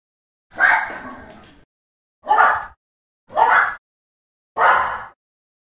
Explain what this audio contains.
Friends' Dogs
Dogs of friends. Barking whenever the door bell rings. So excited!
Recorded with Zoom H2. Edited with Audacity.
guard-dog, doggie, domestic-animal, guard, bark, dog, woof, arf, canine, barking, k-9, cute